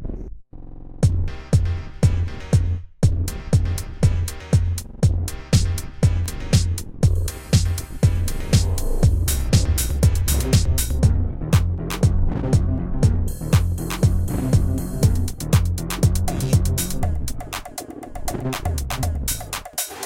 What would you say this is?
Vocal Like Beat 4
A beat that contains vocal-like formants.
Beat, Filter, Vocal-like, formant, Complex